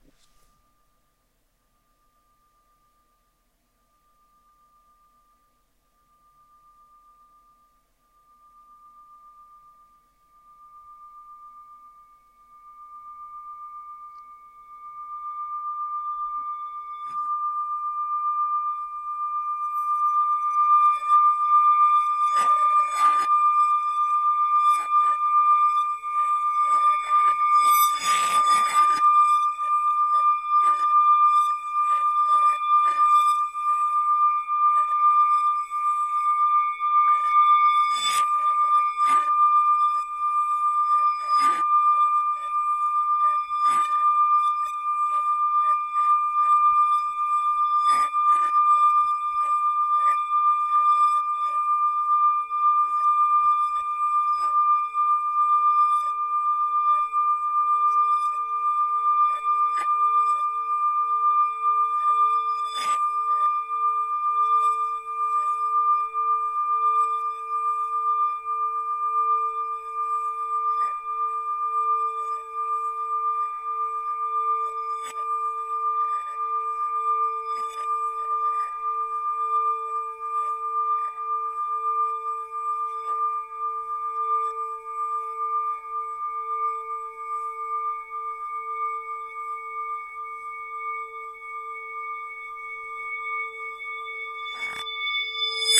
Horror Scrape 1
A dark metallic scraping sound. A bell sound recorded on a tascam dr-05 then put through the monsterchorus vst, and heavily overlayed with vox and tremolo.
Ambient, Atmosphere, Cinematic, Dark, Drone, Film, Free, Horror, metallic, Sci-fi, Scraping